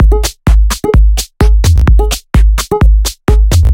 drum sequence kick chh and low tom
A basic drumsynth sequence with kick, close hihat and low tom...